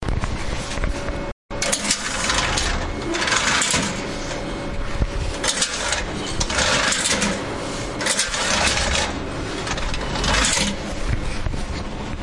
industrial sound design
design, industrial, sound